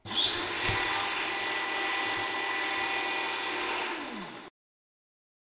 KitchenEquipment Blender Stereo 16bit
messing with the blender
16, bit